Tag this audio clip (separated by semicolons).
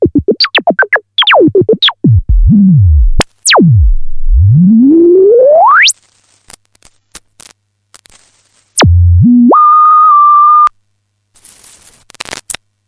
analogue; crazy; MC202